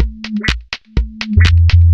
Minimal percussion loop with some instrumental things going on.